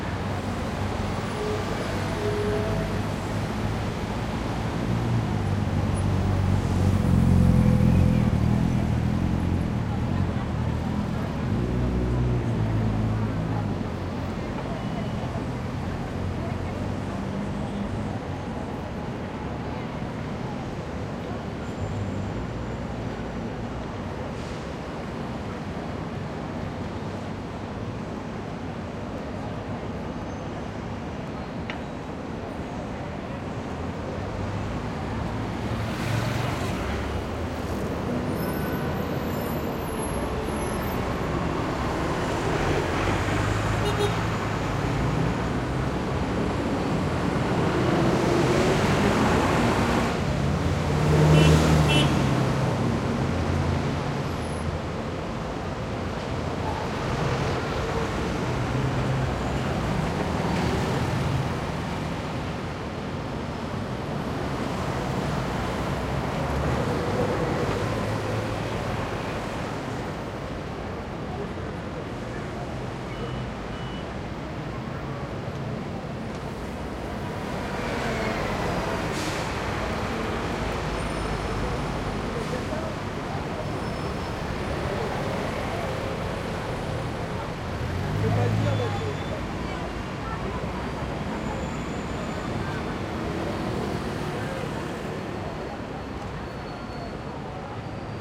140802 London OxfordStSide R
4ch surround recording of the intersection of Oxford St. and Park St. in London/England. Traffic is heavy, with many passing cars and buses, plus lots of pedestrians out to shop in the multitude of stores lining both sides of the street.
Recording was conducted with a Zoom H2.
These are the REAR channels of a 4ch surround recording, mics set to 120° dispersion.
bus, busy, car, cars, city, crowd, England, field-recording, London, loud, noise, pass, passing, people, road, street, surround, traffic, urban